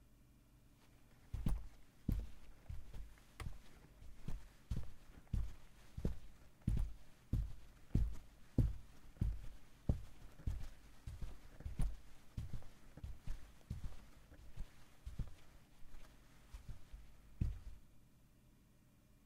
Schritte BootsTeppich2
Foley of steps in boots on carpet.
Room, Schritte, Footsteps, Walking, SFX, Steps, Foley, Teppichboden, Walk, Carpet, Stiefel, Boots